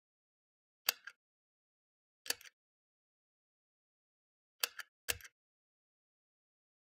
Pull switch for a ceiling light
button, click, lamp, mechanical, string